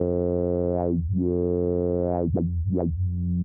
This is a recording of an analogue bass synthesizer, made by Focusrite Saffire Pro 26. It's a smooth sound with an instant attack and two final wobble created with an LFO.
The sound has been equalized and compressed and some reverb mixed with an aux.
140 bpm.
Good Fun.
bass, dub, dubstep, low, sub, synth, wobble